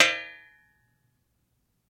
Chair-Folding Chair-Metal-Back Hit-06
The sound of a metal folding chair's back being flicked with a finger.
bang, tink